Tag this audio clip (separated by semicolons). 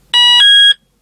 alarm; chime; opening; open; door; beep; security